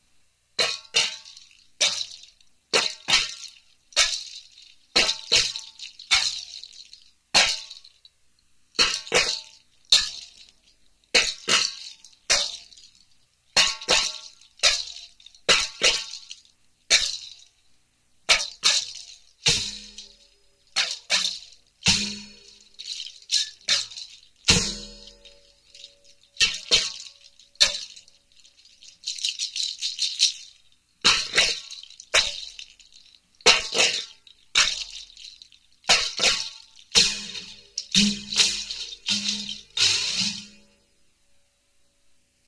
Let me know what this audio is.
Yet more of the same. Stupid drum beats for sampling.
diy, beat